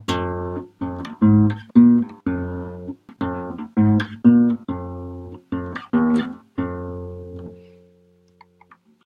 I played some of the mission impossible intro. Changed it up a bit and edited the audio. I speeded up the audio, reversed a few things and tuned down the volume.
loop, impossible, style, guitar, action, bass, mission